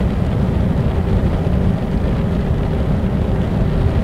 AMBLM air conditioner rumble loop
A close-miked air conditioner unit in a small bathroom. Can be filtered to create a low rumble. Also sounds like a minecart/lore. It's a loop!
Recorded with Zoom H4N XY